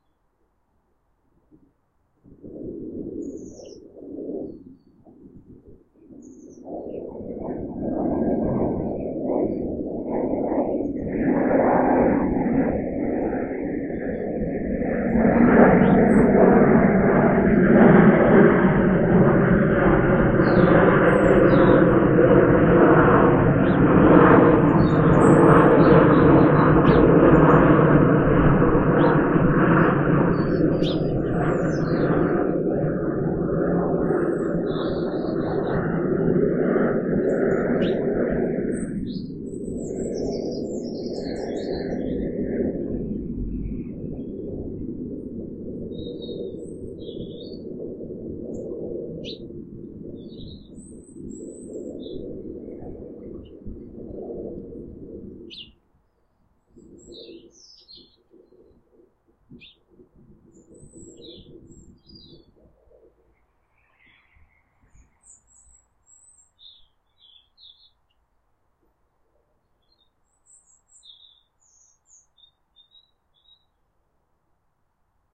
Aeroplane overhead with birds.
sky, Airplane, bird, aeroplane, plane, loud, birds, airport, tweet